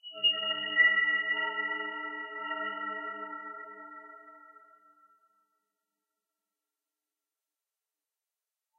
metal resounded2
a resounding drone